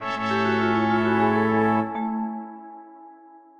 The sound is composed in LMMS whith 2 packs of sound : GeneralUser GS MuseScore v1.442 and Sonatina_Symphonic_Orchestra.